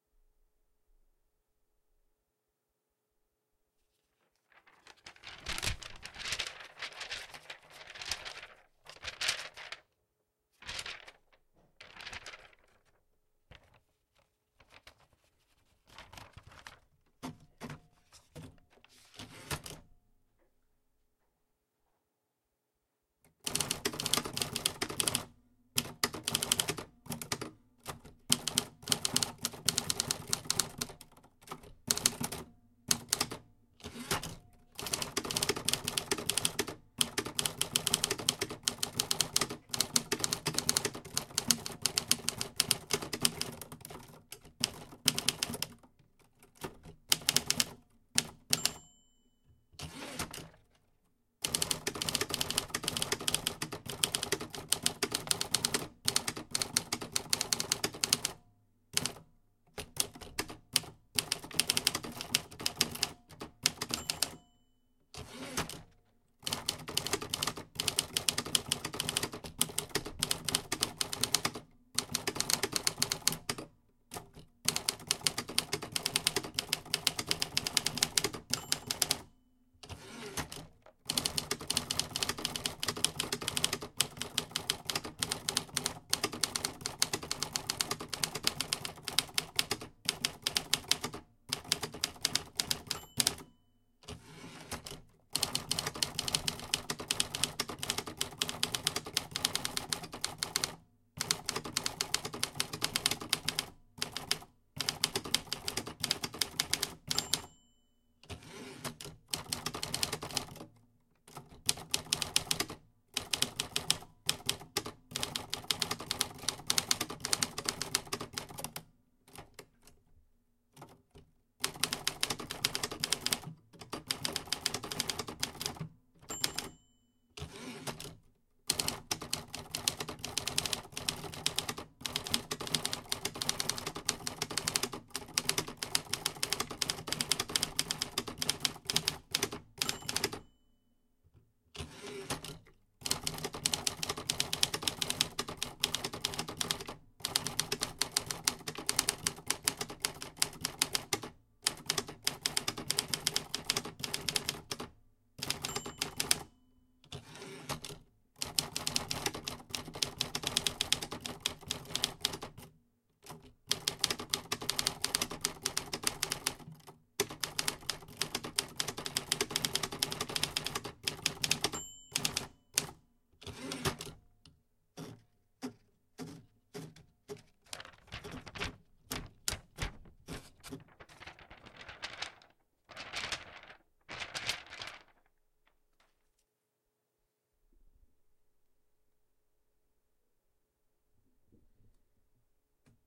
loading a typewriter with paper and typing a few lines of gibberish.
recorded with rode podmic.